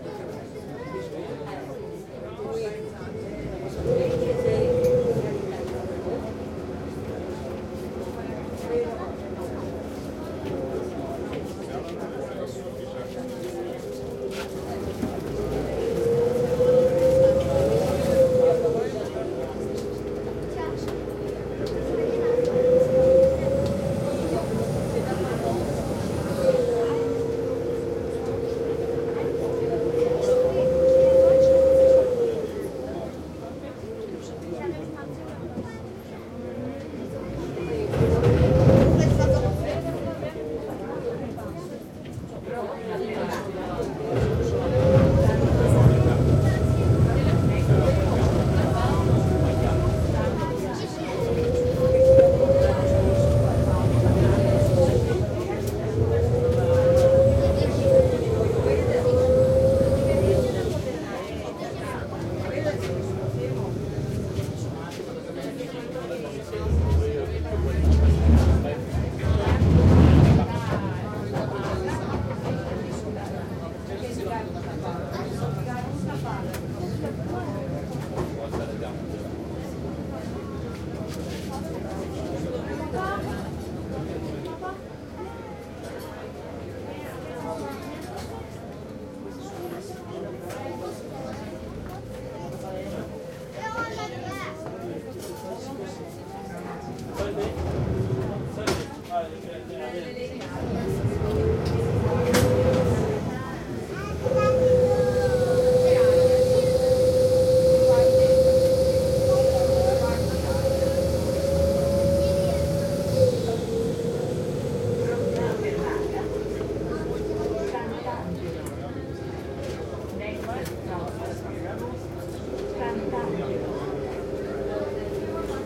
120731 Venice AT Vaporetto F 4824
A Venetian vaporetto, or water-bus, leaving a station and proceeding down the canal, recorded from inside, featuring tourists talking in many languages, motor and docking noises.
These are some recordings I did on a trip to Venice with my Zoom H2, set to 90° dispersion.
They are also available as surround recordings (4ch, with the rear channals at 120° dispersion) Just send me a message if you want them. They're just as free as these stereo versions.